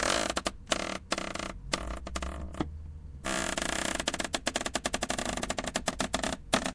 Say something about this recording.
sonido de una silla crujiendo.